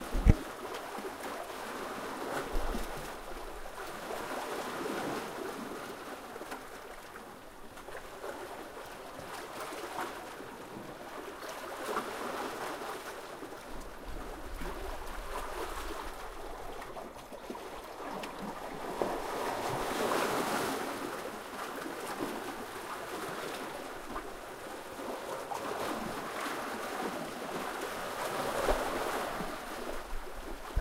Waves at the beach.
beach, coast, ocean, rocks, Scotland, sea, Waves